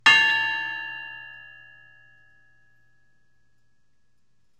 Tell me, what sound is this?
CR BedpostRing3FINAL
An old bedpost struck with a small pipe - long ring
bedpost, clang, long, metallic, ring